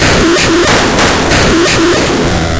DR Ruiner noise loop2
loop, a, circuit, bent, drum, roland, dr-550, machine, samples